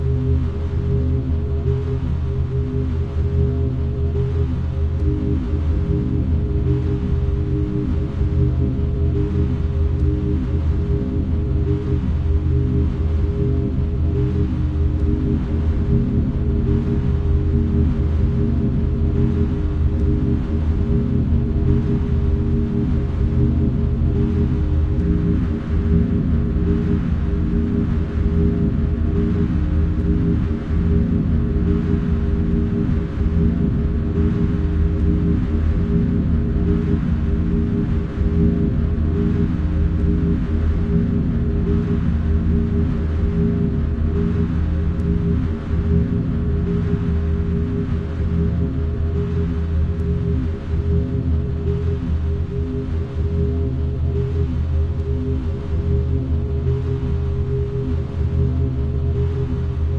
Circle of 5ths by the numbers
Circle of 5ths loop compiled on a 2015 MacBook Pro using field recordings.
Tempo / Time sig:
24 bars of 3/4 timing with each bar lasting 2.5s.
Or 12 sets of 6/8 at 5s per bar with a total length of 60s.
Filters:
HiPass pumped at 60HZ, -5db.
LoPass pumped at 1200HZ at -6db.
Delay set to 0.05s at 600HZ with a 60% Wet mix.
Arranged with the tonic 5th as a constant with the last (fifth) 5th in the circle, starting and ending with the tonic.
Second 5th enters in 5s, third in 15s and the fourth in 25s as the fifth stops.
The fifth 5th begins to fade back in after 10s of silence, which begins the process of the second, third and fourth fading out in that order.
The fifth 5th is at full volume as the loop turns, as is the tonic.
The mood changes noticeably as each consecutive 5th begins, and changes again as the following 5th joins the loop.
symmistry, tension-build, 6, waves, circle, ambience, science-fiction, atmospheric